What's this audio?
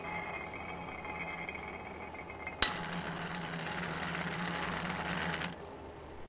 glass kappa 03
this is a recording of part of the process for finishing a hand-blown glass drinking vessel. the glass is placed on a turntable, scored with a diamond bit, and then heated by a flame as it rotates.
the heat builds stress at the scored points and eventually the "cap" ("kappa" in swedish) cracks and springs off, leaving the glass ready for finishing.
the "tink" in the recording is the glass cap/kappa jumping off and then rattling as it continues to rotate on the turntable.